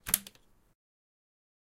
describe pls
short audio file of plugging a two pin plug into a power outlet
connect, electricity, fx, metal, noise, owi, plastic, plug, sound